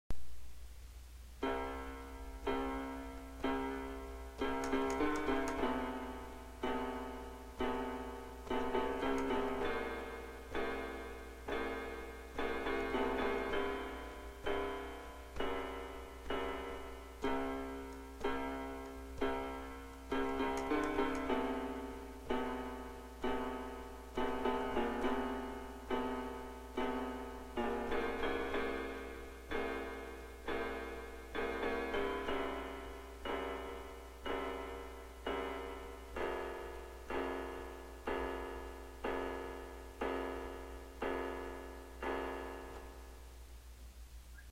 Introevil es la cortina de inicio al corto animado "Monstruoso" propiedad del mismo autor.
free, Terror, anxious, horr, creepy, phantom, nightmare, haunted, sinister